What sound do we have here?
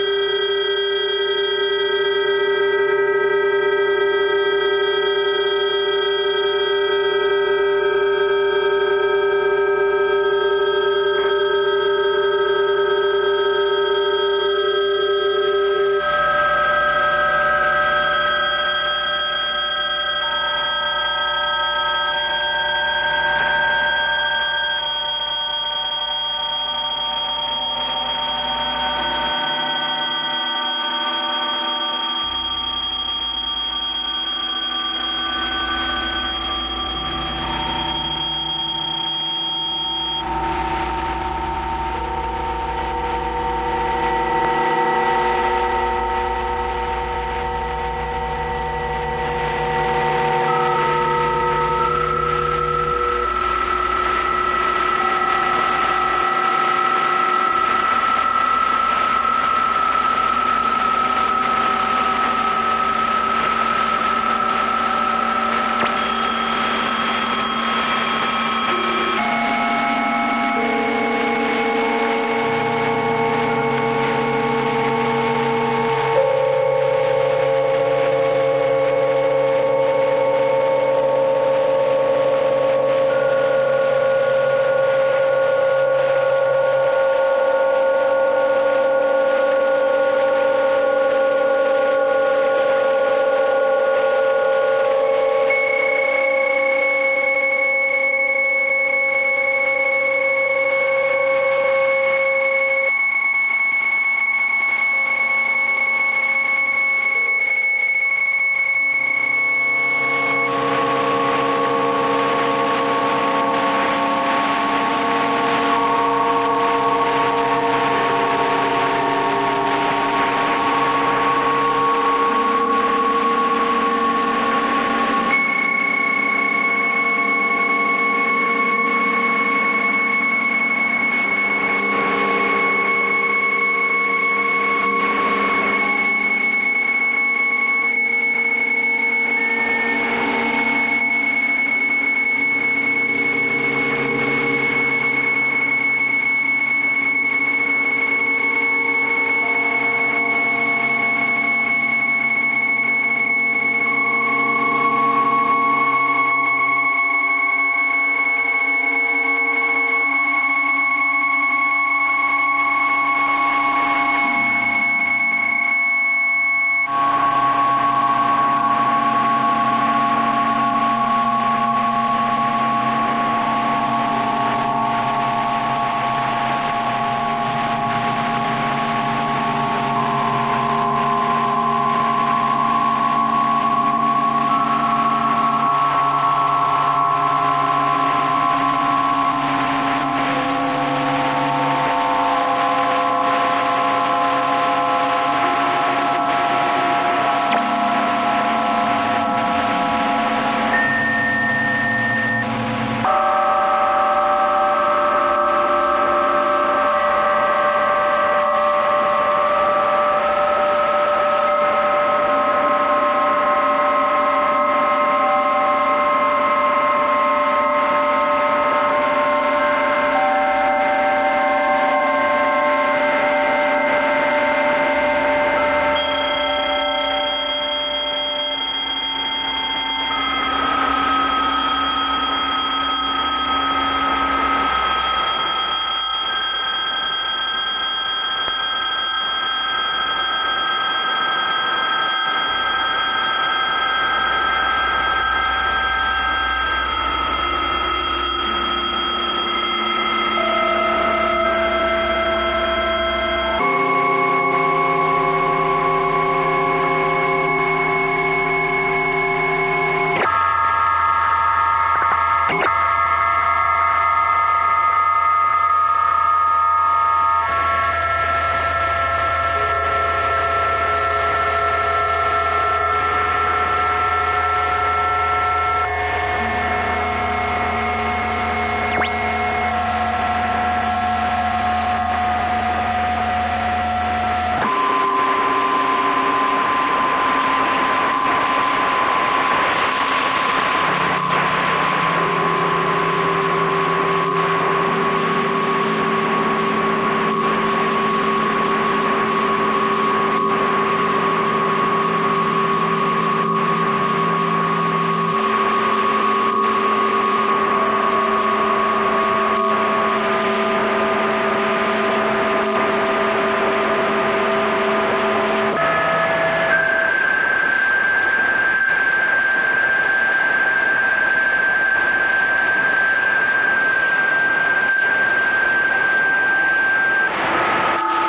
RTTY transmission sound
electronic, noise, signal, radio, rtty, ham